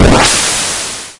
PitchUp Sweep2
arcade, chippy, chiptune, retro, vgm